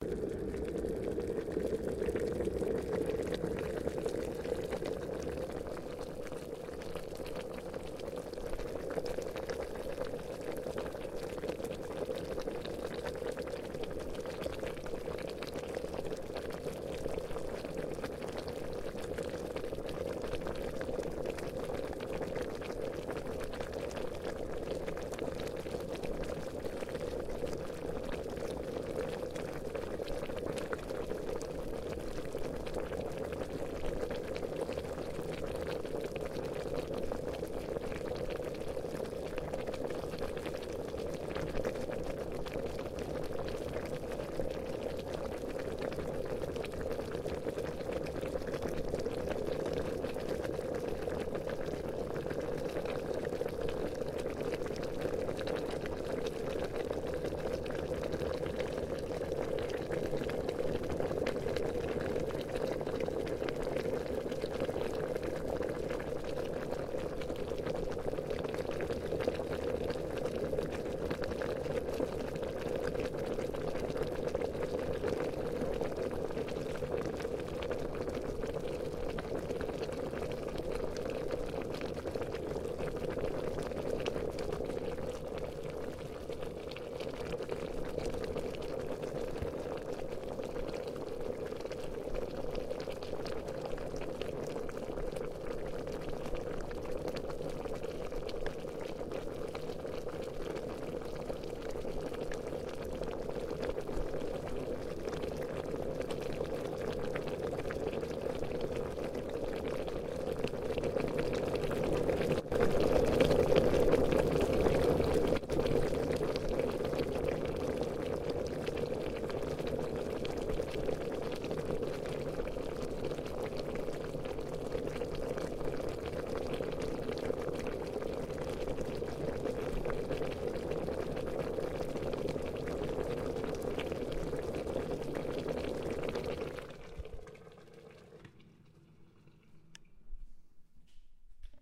Boiling water
boiling-water kettle